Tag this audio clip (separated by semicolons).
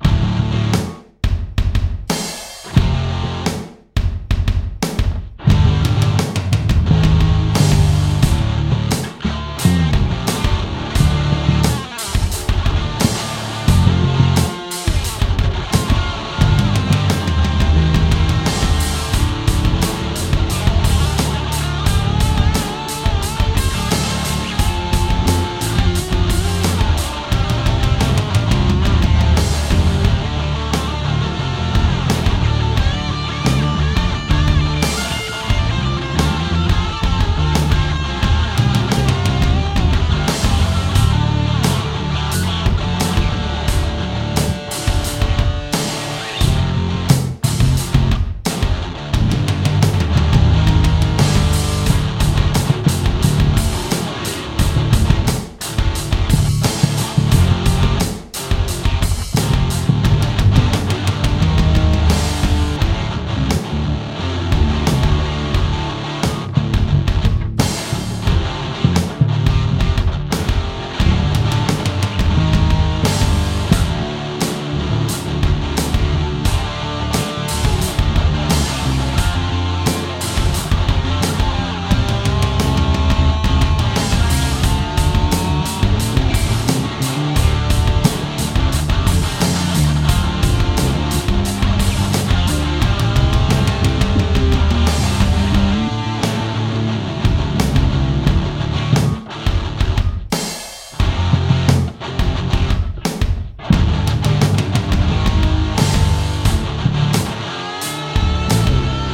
Backing; Heavy; Rock; Metal; Rhythm; Guitar; Drums; Hard; Music; 120; Bass; Loop; BPM